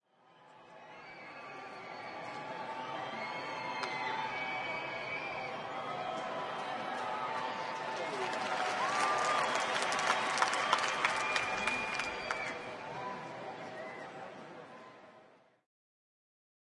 WALLA Ballpark Applause Short 04
This was recorded at the Rangers Ballpark in Arlington on the ZOOM H2.
applause, ballpark, baseball, cheering, clapping, crowd, field-recording, sports, walla